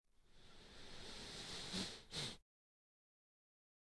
breath nose snif
male breaths in through nose
breaths-in, nose, sniff